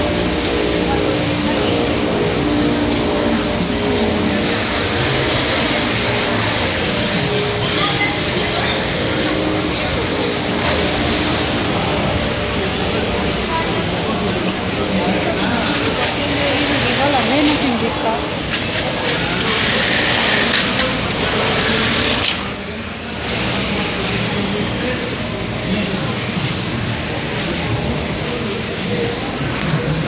gutierrez mpaulina baja fidelidad industria confección
Industrial space of sewing machines at the end of the work hours
factory, industrial, machine, machinery